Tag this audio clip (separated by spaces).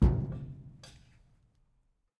container firm impact low Metal rumbly